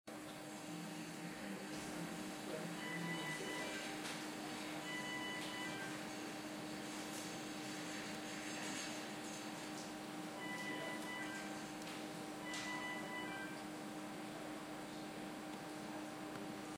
intensive care ventilator alarm
In the intensive care unit (ICU), the alarm sounds on the ventilator in a bedspace nearby alerting the staff their attention is needed.
intensive-care, life-support, alarm, icu, ventilator